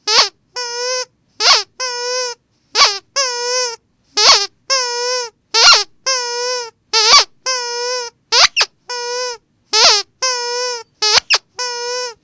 Squeaky Toy 10
Made by squeezing a squeaky toy
sound-effect, garcia, sac